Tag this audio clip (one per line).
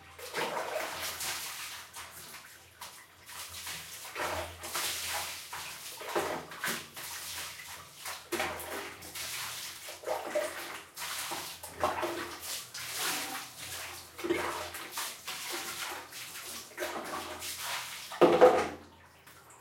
water
indoor